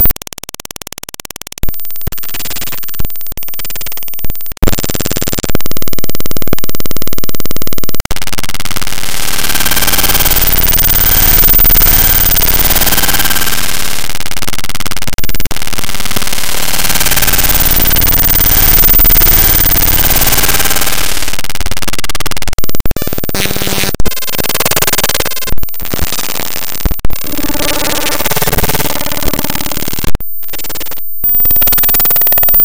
Computer Glitch 1
This sound was made by importing a picture into Audacity, no mangling used. You may do so as much as you like. Made with Audacity.
glitch, robot, science, sound-art, technology